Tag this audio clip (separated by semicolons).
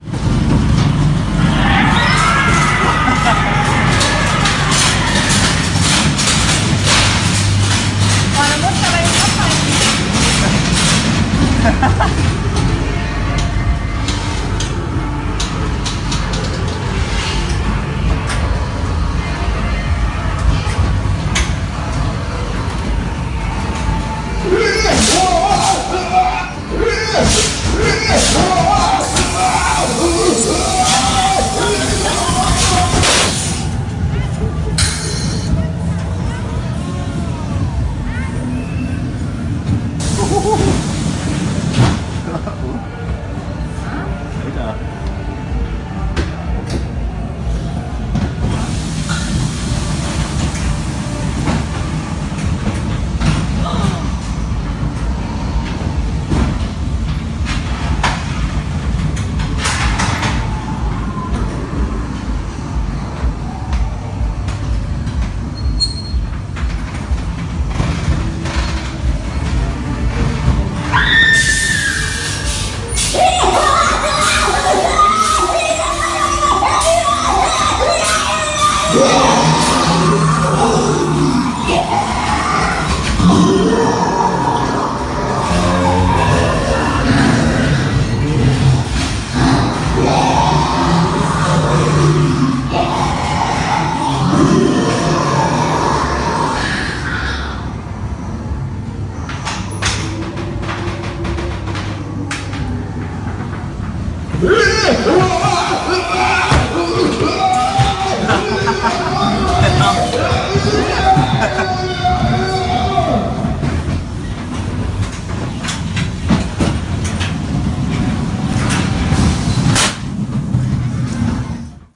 amusement; carousel; fair; fairground; funfair; geisterbahn; merry-go-round; ride; tunnel-of-horrors